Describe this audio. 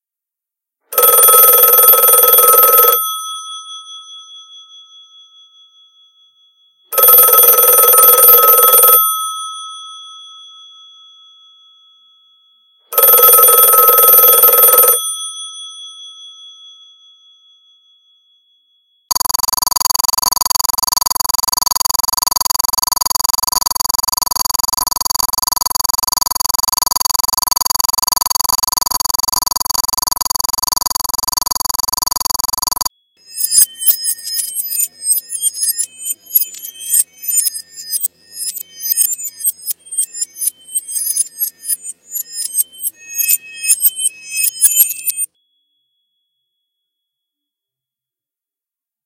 Full Matrix
for use in a streaming underground Hip Hop music video show
Matrix, Dial